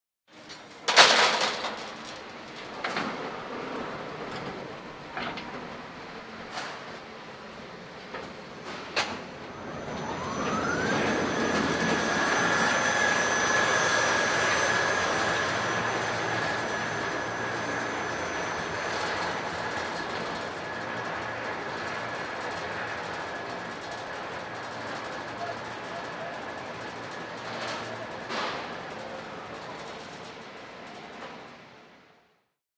Recorded on Marantz PMD661 with Rode NTG-2.
The sound of an industrial lift on a building site. The door clangs shut and then the lift moves away downwards.
industrial, metal, lift, construction, clang, door, field-recording, building, machine, chains, mechanical